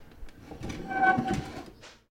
Chair scraping on tiles
Chair scratching sound: Chair scraping on tile floor, strong noise and scratching sound. Recorded with a ZOOM H6 recorder and a RODE NTG-2 Shotgun mic. Sound was attenuated, but no processing was done to the sounds. Recorded in a dining room of a house by dragging a wooden chair around on a floor made of large tiles.
furniture-sounds, furniture, scratching-noises, chair-sounds, OWI, chair, scratching-sounds, furniture-noises, chair-scratching-on-floor